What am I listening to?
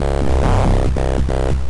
140 SynergyTek Synth 01 B
lofi synth piece